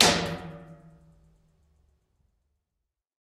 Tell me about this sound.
Stone on Dry Well
This is simply dropping a rock to a well which I thought had water, but turned out was dry.
Recorded with Sound Devices 302 + Primo EM172 Omnidirectional mic.
drop, hit, impact, reverb, rock, stone, well